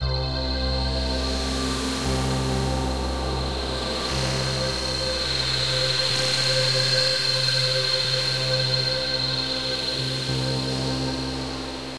8 ca pads
suspense intense drama
atmo, horror, soundscape, white-noise, general-noise, ambiance, fi, sci-fi, atmospheric, sci, city, score, background-sound, atmos, ambient, music, ambience, atmosphere, amb